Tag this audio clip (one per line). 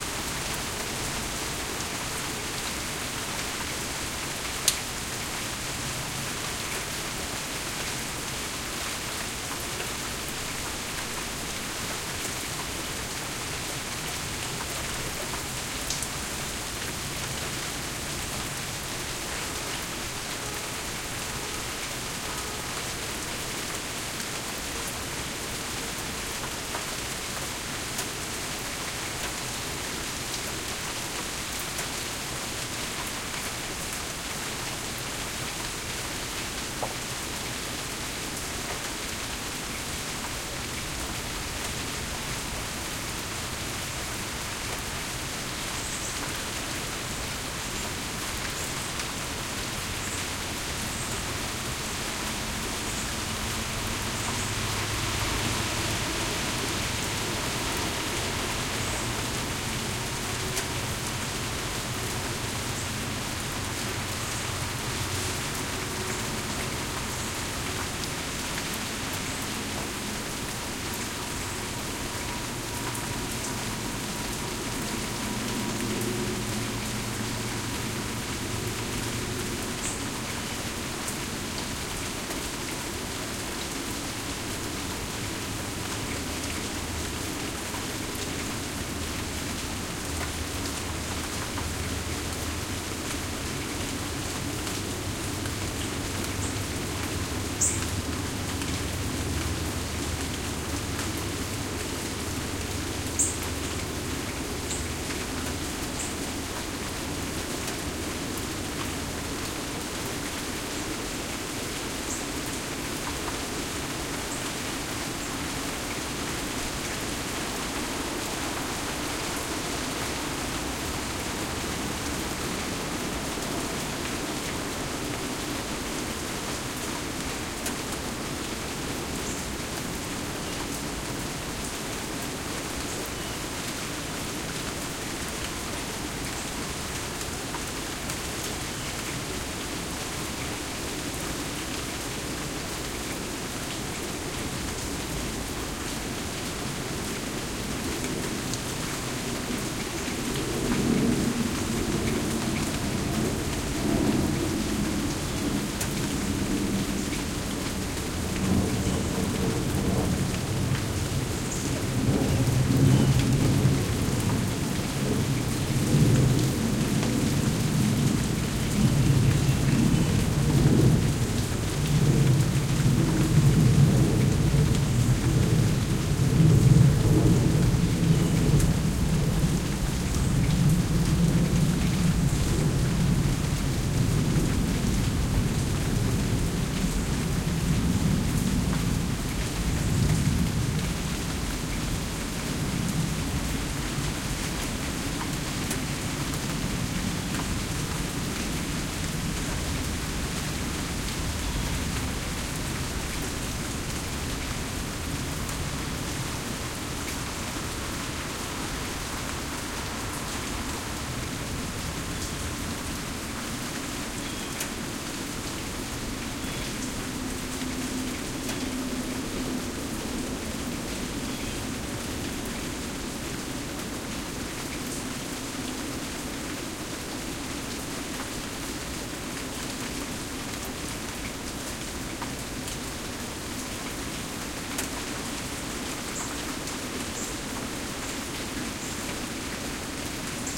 plane
summer
ambience
binaural
field-recording
Zoom
nature
weather
lavalieres
H1
rain